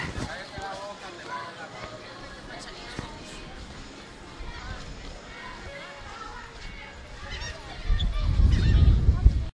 newjersey AC boardwalk mono
Monophonic snippet of Atlantic City Boardwalk recorded with DS-40 and edited in Wavosaur.
atlantic-city, boardwalk